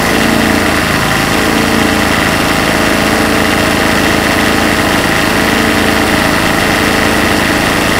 Engine noise at a high trottle rev